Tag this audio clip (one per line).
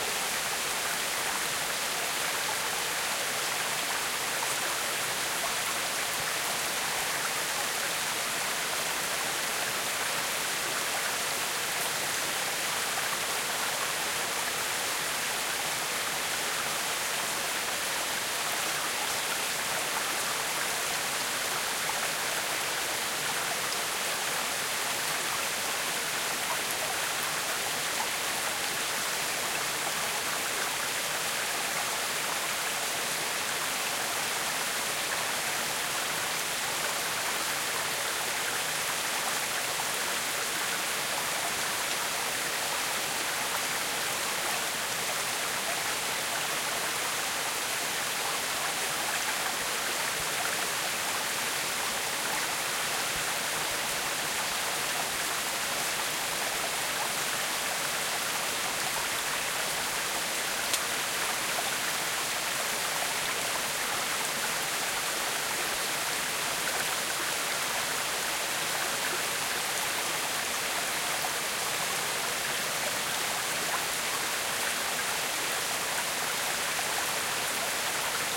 water
melt-water
creek
current
bavaria
wild
ambient
field-recording
stream
nature
river
flowing